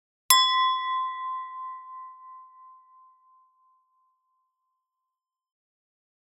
Wine Glass Clink
Wine glass struck with metal spoon
clink, clinking, collide, empty, glass, ringing, toast, wine